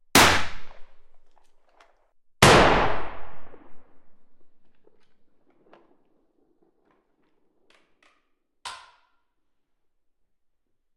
gunshot indoors
A genuine gunshot fired in a medium sized room. First shot 100% speed, second 50%
No cred needed!
indoors, shooting, gun, weapon